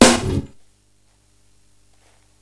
The dungeon drum set. Medieval Breaks
idm, breakbeat, dungeons, amen, dragon, breaks, medieval, breakcore, rough, medievally